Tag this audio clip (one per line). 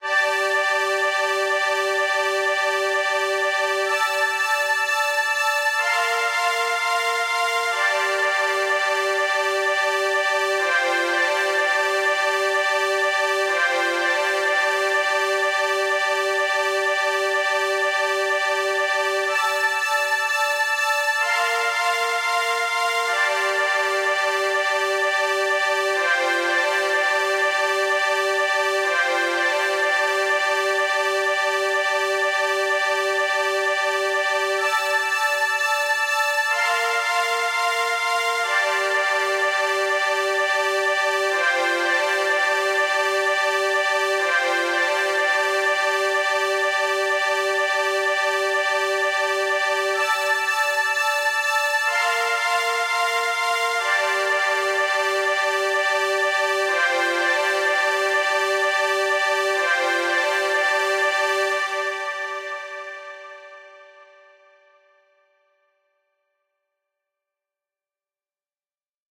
backs
learning
mix
pad
pads
strings
two